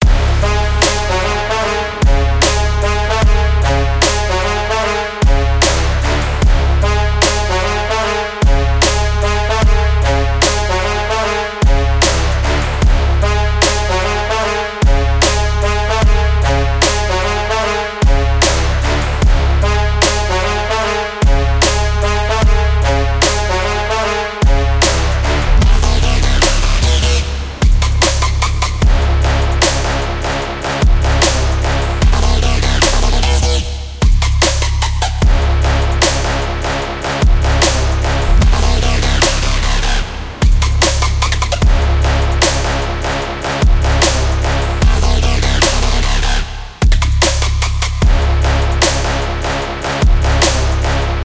Epic Trap Loop
Track: 50
Genre: Trap Beat
Just trying trap genre. I'm using free cymatics trap starter sample pack.